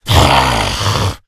A low pitched guttural voice sound to be used in horror games, and of course zombie shooters. Useful for a making the army of the undead really scary.
Evil, gaming, Ghoul, indiedev, indiegamedev, Undead, videogames, Zombie